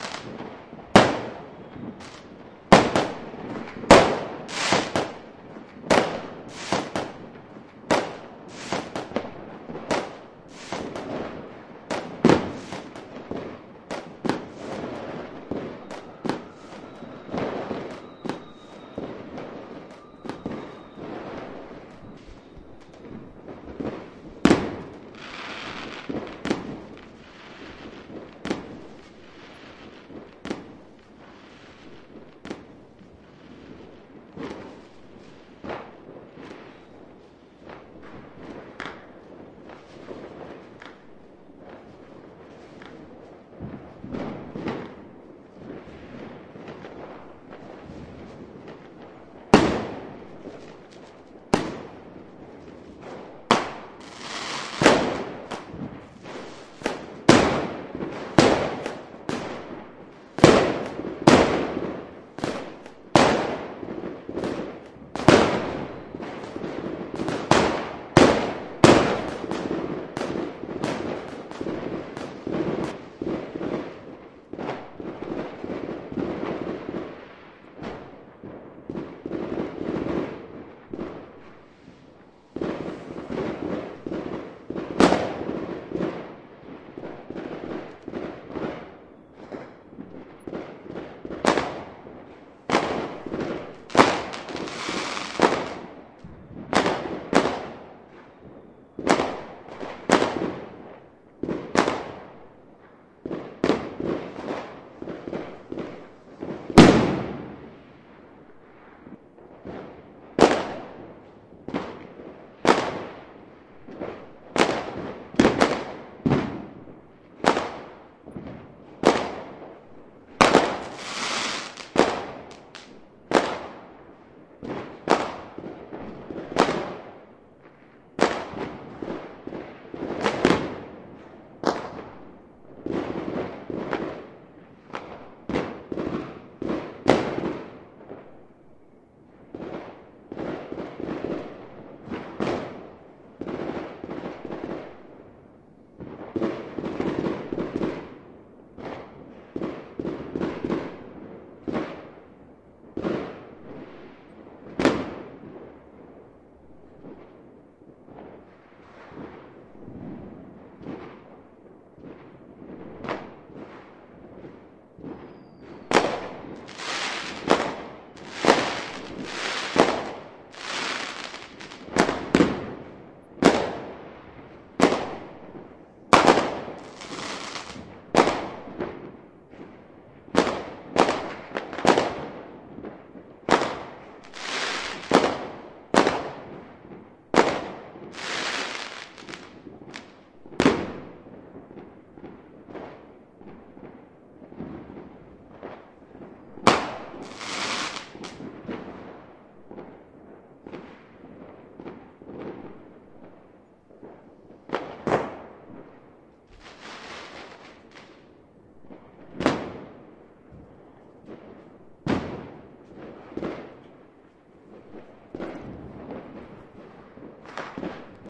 Vuurwerk in de achtertuin
New Year's fireworks as could be heard from my garden on January 1, 2009.
field-recording, the-hague, new-year, fireworks